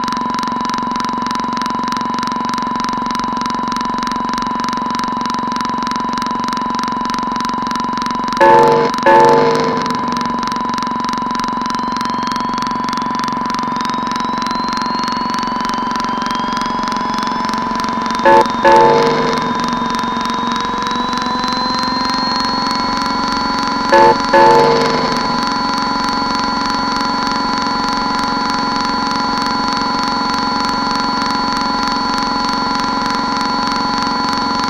Small lumps of sounds that can be used for composing...anything